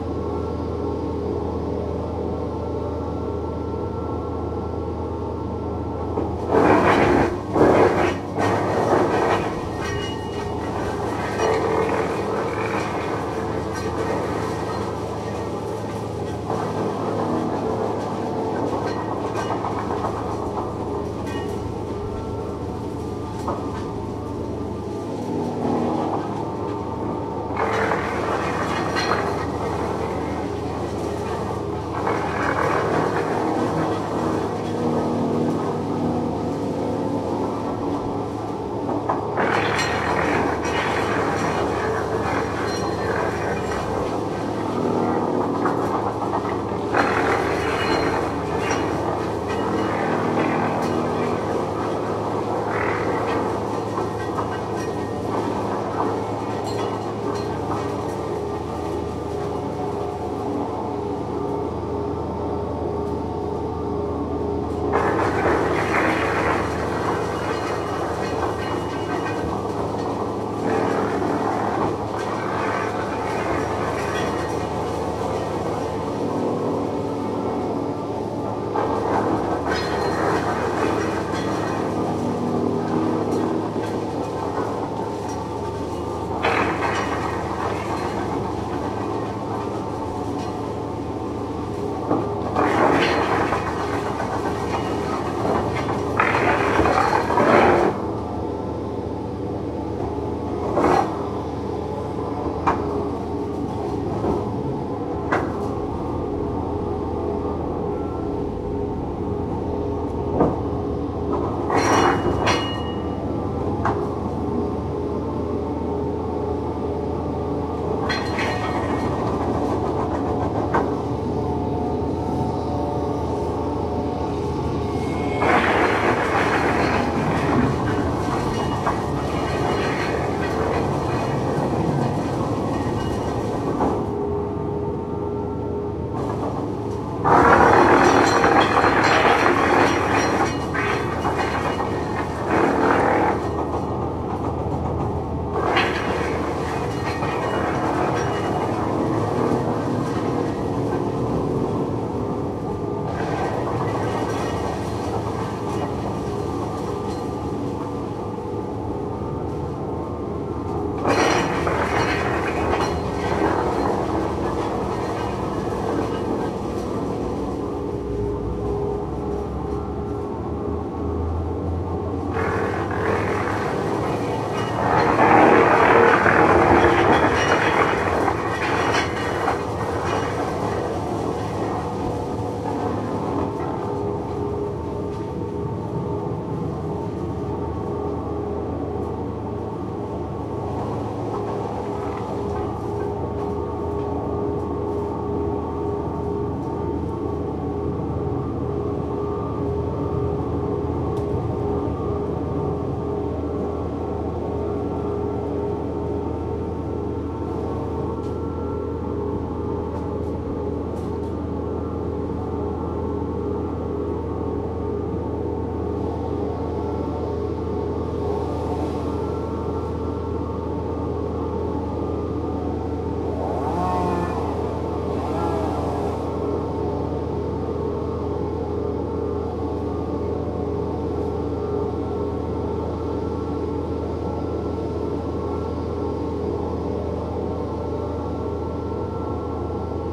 A stereo field-recording of an industrial, engine powered arboricultural wood chipper. There is also a chainsaw at the end. Some echo from surrounding rock outcrops. No fade in or out so as to loop. Zoom H2 front on-board mics.